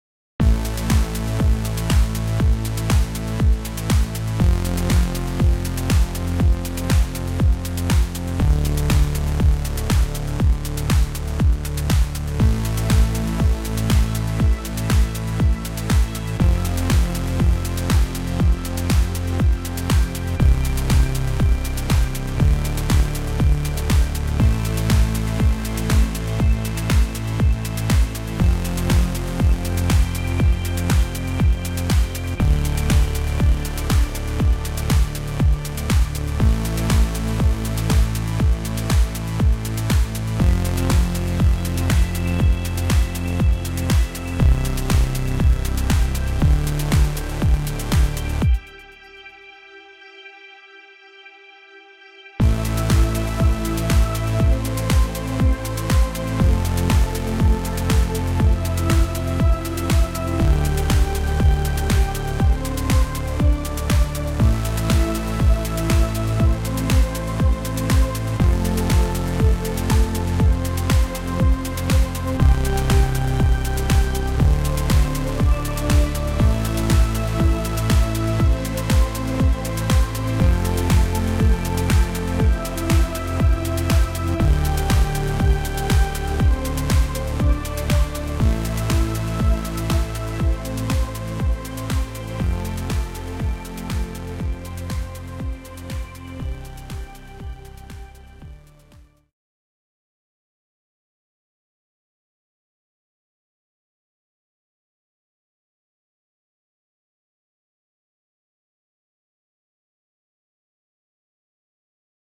Night - Soft Techno
beat, chill, future, night, soft, song, space, techno, up